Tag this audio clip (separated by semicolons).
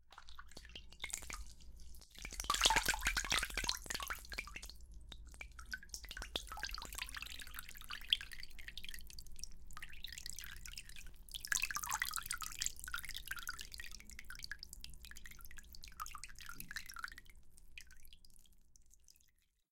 Gotereo; Water; leak